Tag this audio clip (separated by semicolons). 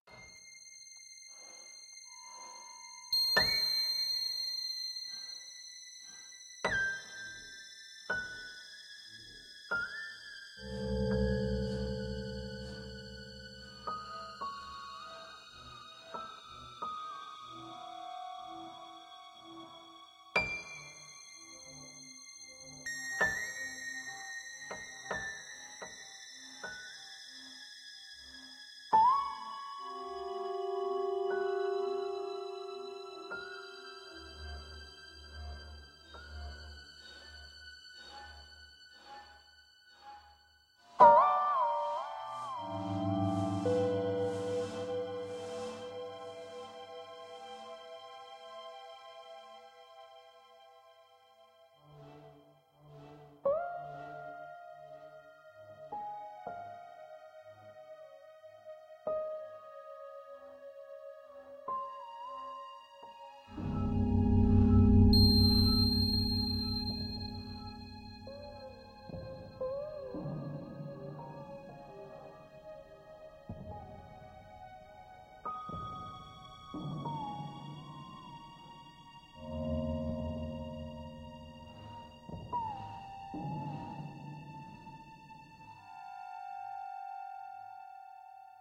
Ambient; Loop; Scary; Dark; Piano; Creepy; Horror; Creature